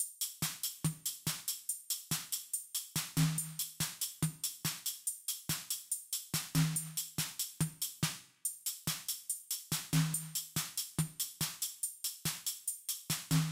SATPS80S-02 02 EM Drums
Psytrance, Dance, Trance